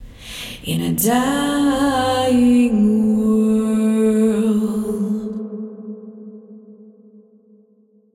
"in a dying world" V2
Me singing "in a dying world", chest voice (low). The clip preview might have squeaks, but the download is high quality and squeak free.
Recorded using Ardour with the UA4FX interface and the the t.bone sct 2000 mic.
You are welcome to use them in any project (music, video, art, interpretive dance, etc.). If you would like me to hear it as well, send me a link in a PM.
BPM 100